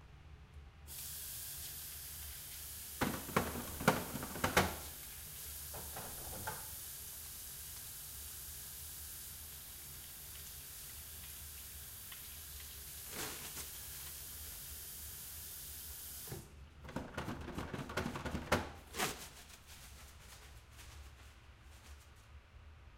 the sound of one washing hands at a bathroom sink, then drying hands with a paper towel from a dispenser. recorded with SONY linear PCM recorder in a dormitory bathroom.